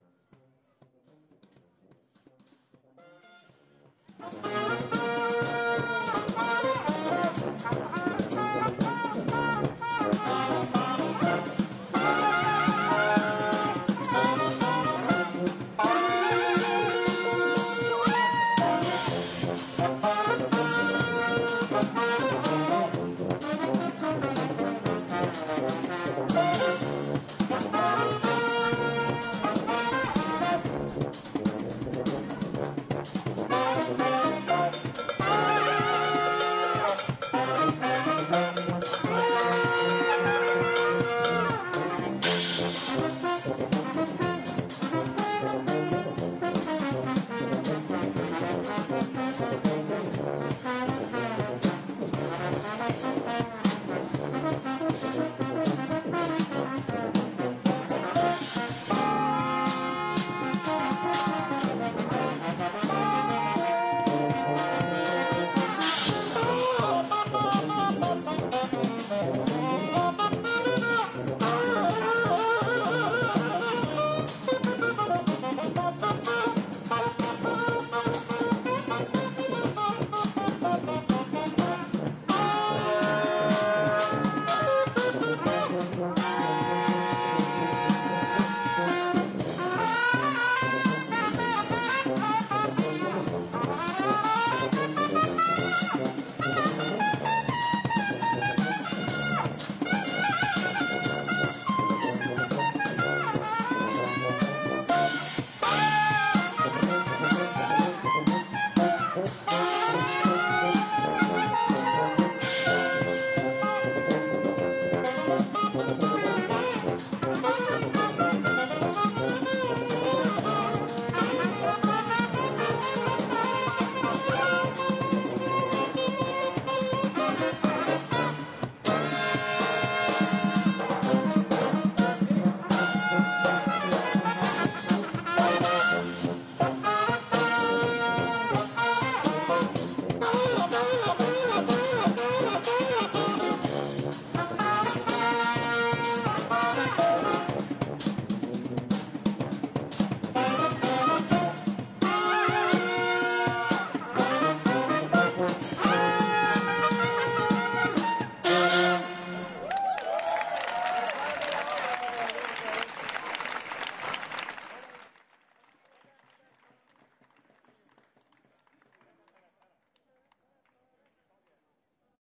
130615-brass band chiado 02
a brass band plays free music for free #2